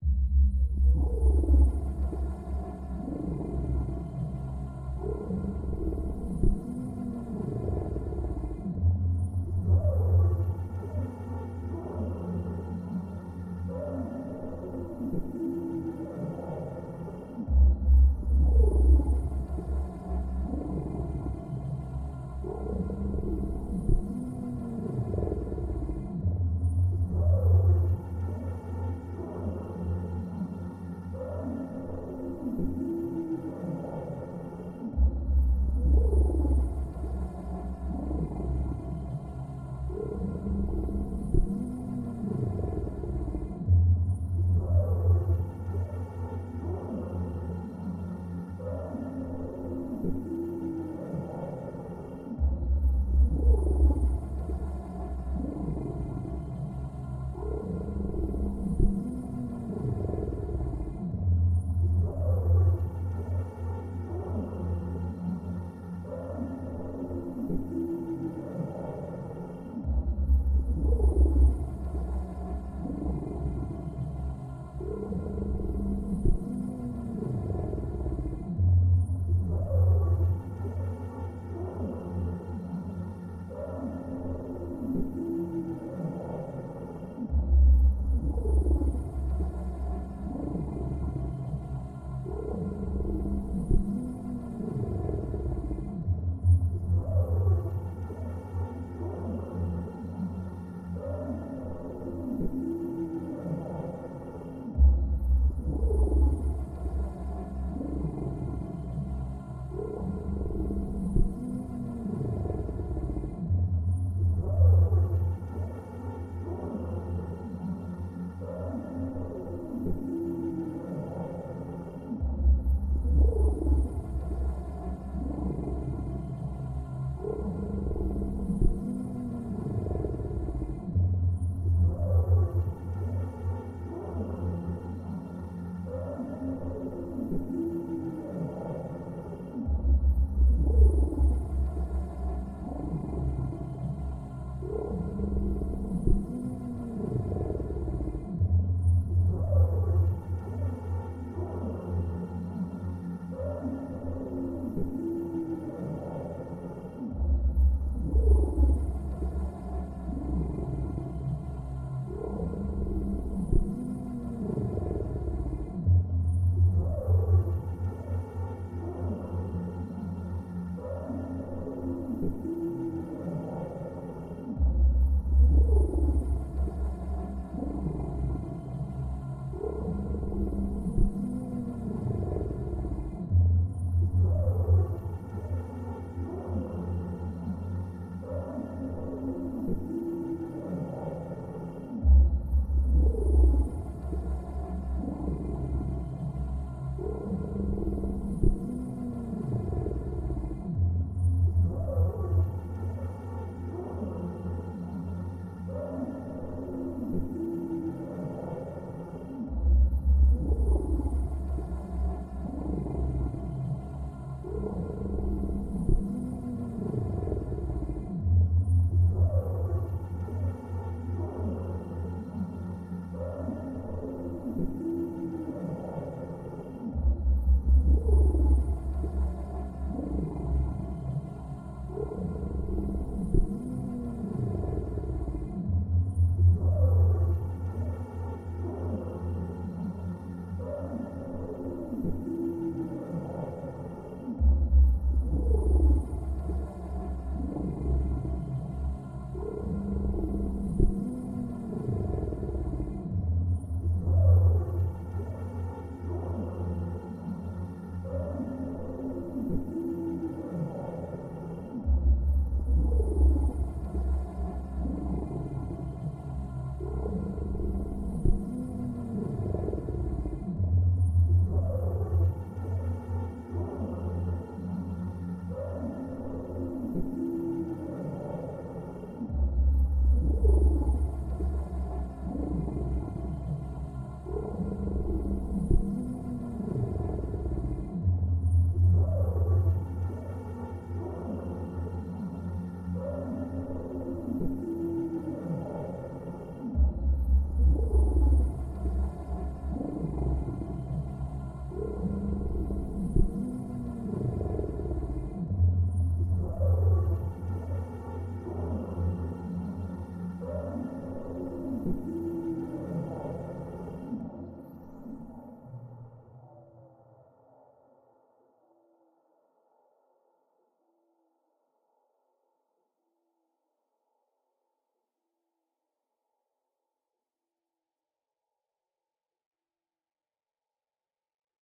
CWD LT ambient 14 planet
ambience, ambient, atmosphere, cosmos, dark, deep, drone, epic, fx, melancholic, pad, science-fiction, sci-fi, sfx, soundscape, space